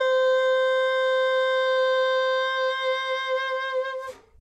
Alto Sax c4 v31
The second sample in the series. The format is ready to use in sampletank but obviously can be imported to other samplers. This sax is slightly smoother and warmer than the previous one. The collection includes multiple articulations for a realistic performance.
woodwind, vst, saxophone, jazz